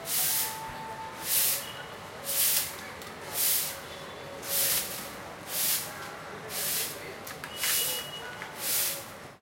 Sweeping in a busy street
Sounds recorded from roads of Mumbai.